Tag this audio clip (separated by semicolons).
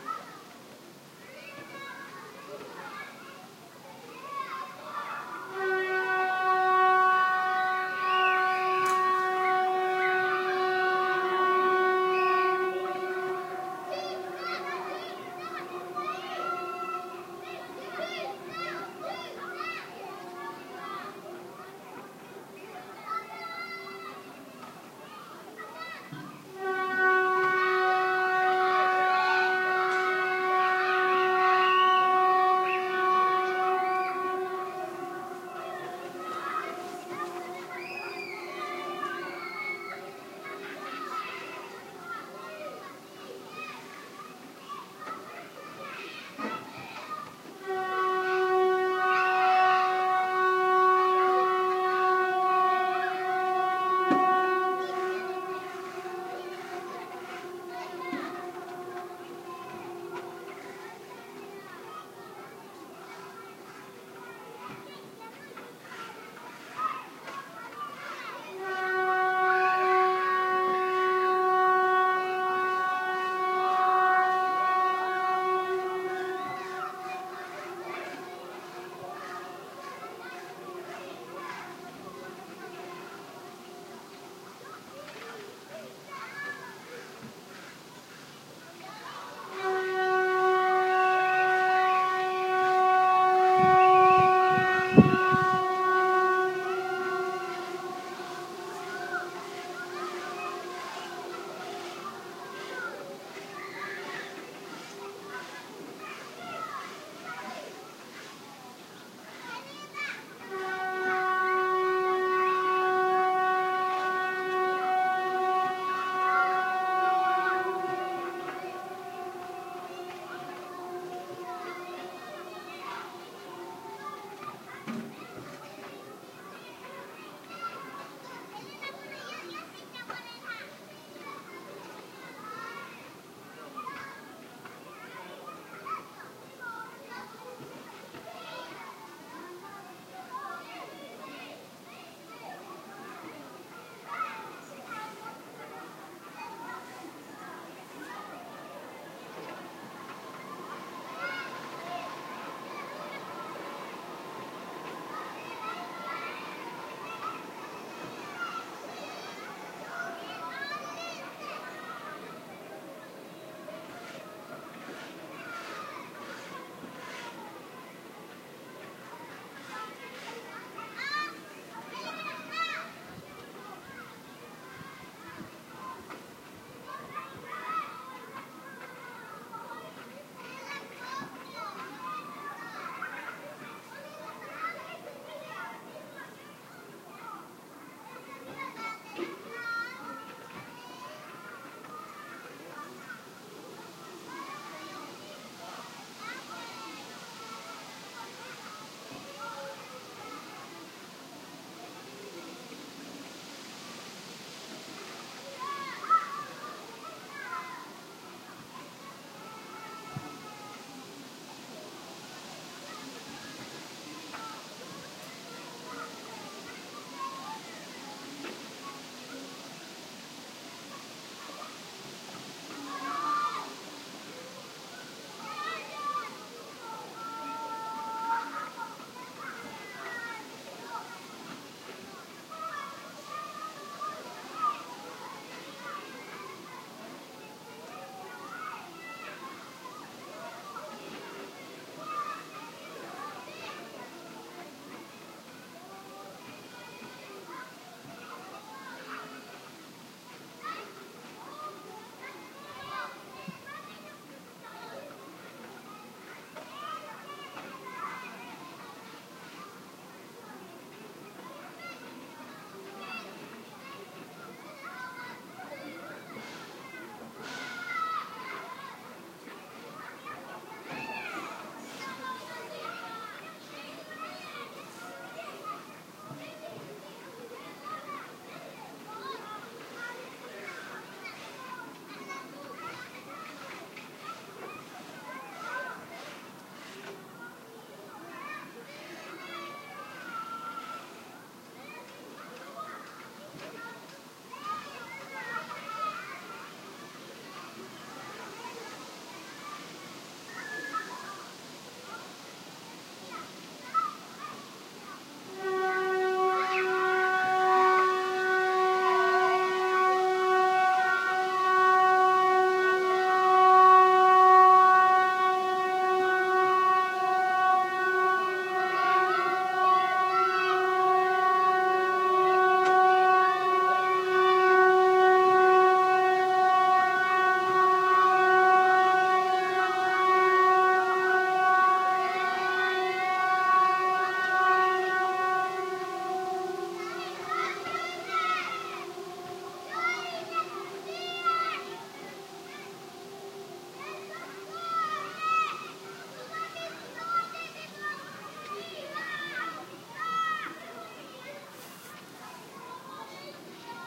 alarm; allm